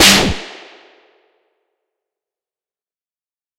metallic sounding snare, very loud.
industrial, snare